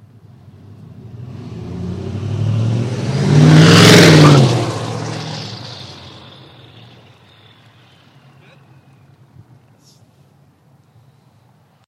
Diesel Drive by #4 MZ000007
Ford F350 highly modified diesel engine with after-market turbo drives past
Recorded with Marantz PMD660 & Sennheiser e835 Mic
exhaust, Tuned, Diesel, Turbo, Whistle, Truck, Turbo-diesel